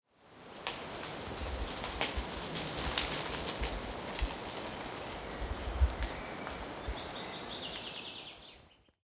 Cyclist in forest
This cyclist happened to ride through during an athmosphere-recording...
field-recording, forest, birds, wind, Cyclist, nature